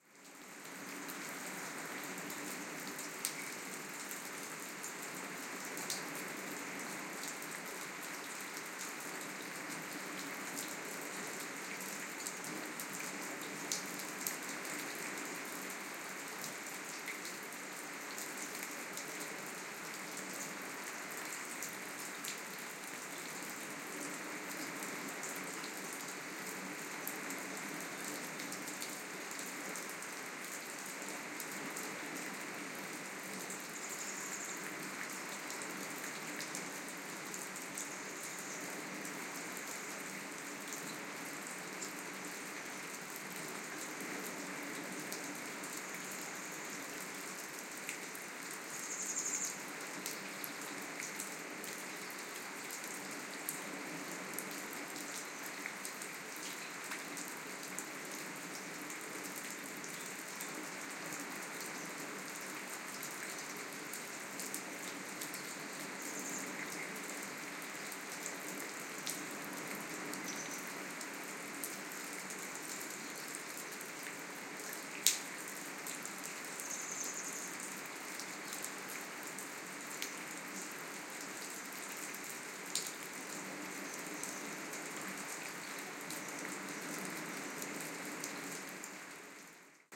RainOnStoneRuinsRiver Spring Montalegre ORTF
Recording of Rain under some stone ruins; River dam noticeable. There are some more defined drops with a slight reverb from the place itself. No extra noises.